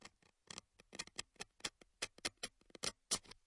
regle qui gratte sur surface